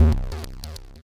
Generated with SFXR. 8 bit sounds for your sound/game designing pleasure!